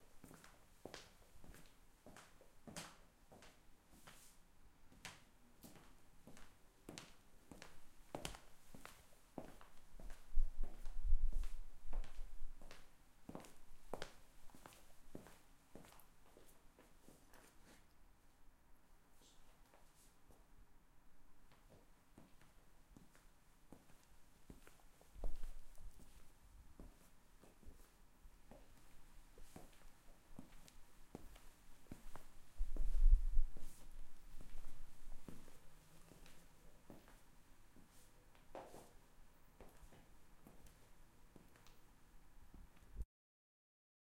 Footsteps fast then slow
Walking on tiles. Footsteps are quick and then they turn into gentle slow footsteps.
floor, footsteps, OWI, steps, tiles, walk, walking